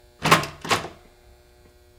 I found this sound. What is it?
Rust Handle 3
Door Handle Rust rusty
door, rust, handle, rusty